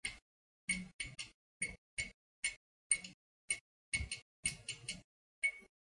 fluorescent light flickering 1
A fluorescent light flickering as it struggles to turn on.
flourescent, fluorescent, light